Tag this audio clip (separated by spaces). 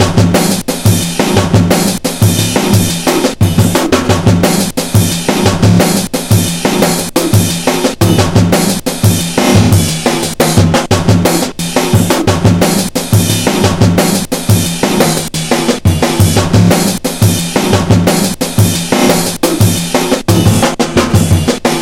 beat beats bigbeat break breakbeat breakbeats breaks drum drumbeat drum-loop drumloop drumloops drums jungle loop loops snare